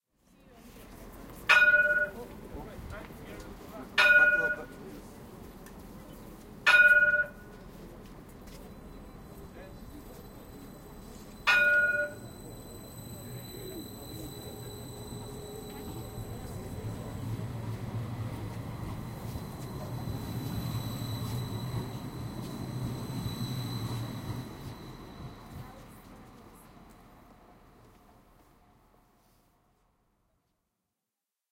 tram bell
A bell on a tram in Edinburgh